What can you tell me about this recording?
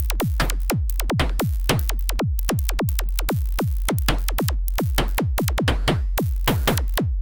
Modular Doepfer Beat
A Beat Made with Modular Synth Doepfer with West Coast Style (FM synthesis, vactrol lopass gate and filter, waveshaping)